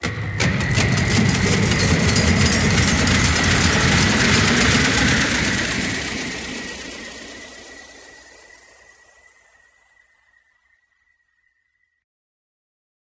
Borg Rise
This could be used for a spacecraft, cyborg movement, etc.